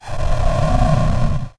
guacamolly swello die 4
Swell. Very airy. Deep bass centered. Varied (airy distorted) mids left and right.
airy, bass, distortion, swell